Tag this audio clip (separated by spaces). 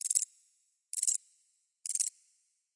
insect animal creature insects